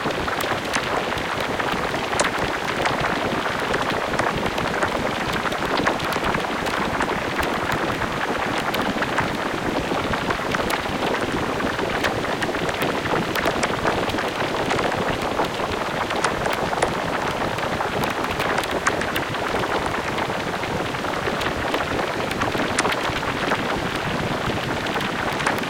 Sound of huge amount of flowing water. Created with Uhe Diva.